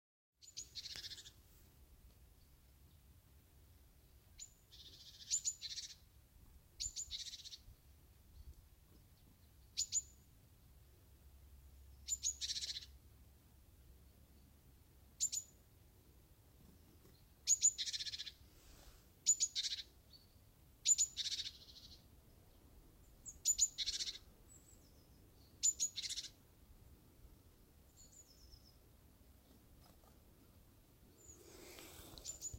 Meise in nahem Strauch zwitschert herum.
Chickadee singing in the woods